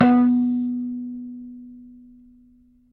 Tones from a small electric kalimba (thumb-piano) played with healthy distortion through a miniature amplifier.
kalimba, contact-mic, amp, thumb-piano, mbira, blip, electric